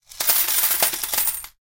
54-Caen monedas
Rain of coins falling to the ground
dropping, coin